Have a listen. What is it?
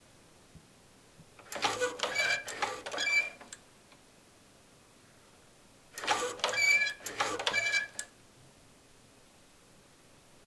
SWING:SEE-SAW
This is the sound of a see saw moving.
see-saw, light, lamp, swing, moving, seesaw, metal